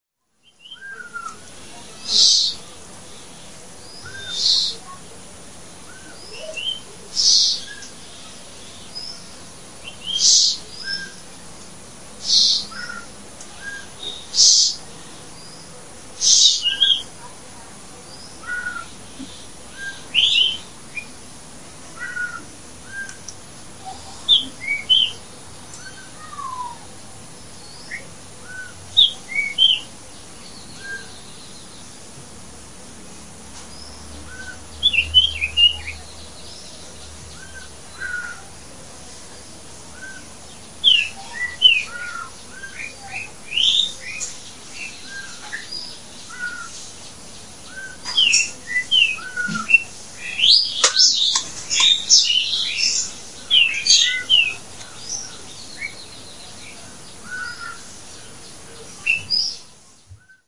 Tree and Bird
Tree bird birds forest tropical nature
birds, Tree, tropical, bird